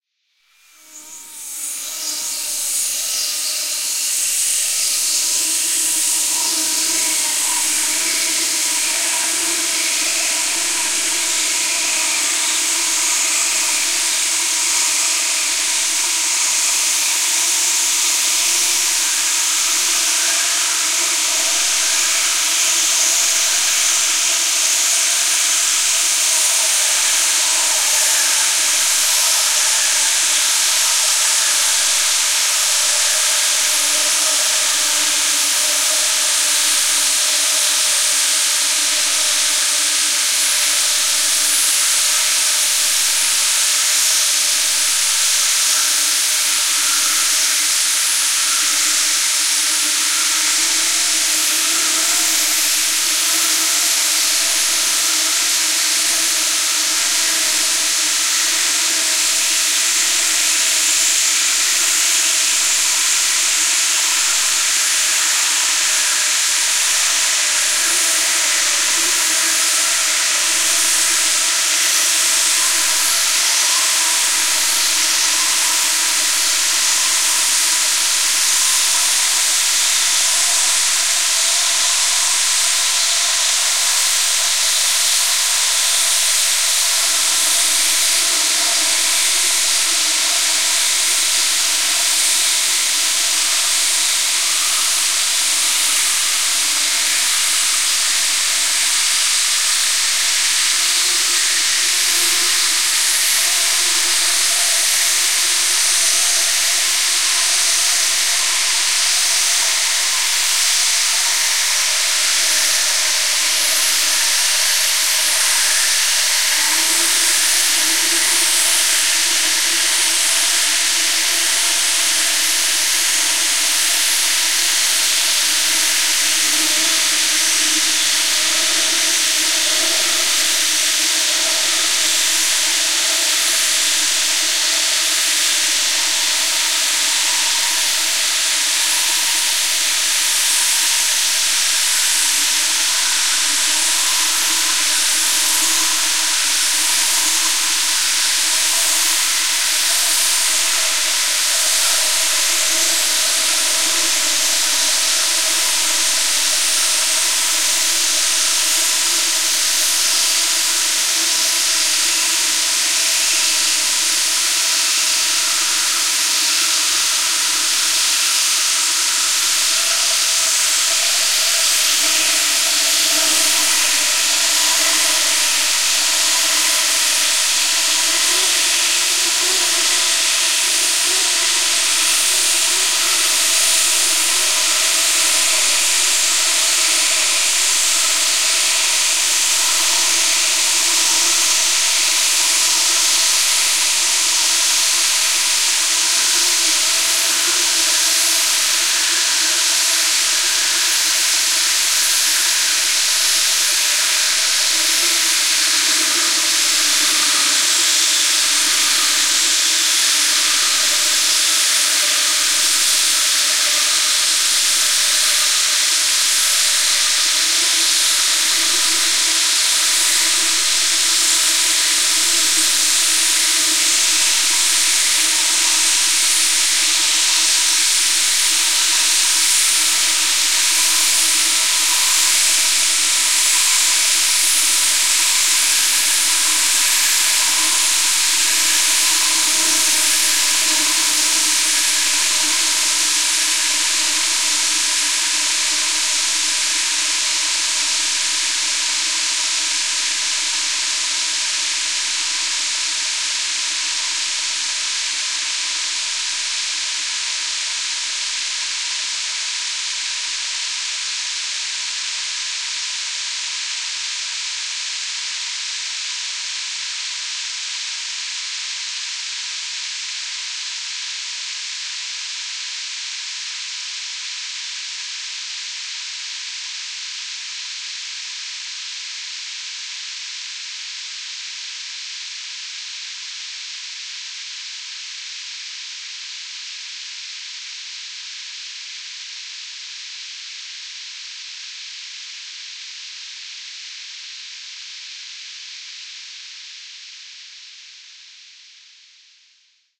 Space Drone 02
This sample is part of the "Space Drone 1" sample pack. 5 minutes of pure ambient space drone. Dense insects atmosphere in space.
ambient, space, drone, reaktor, soundscape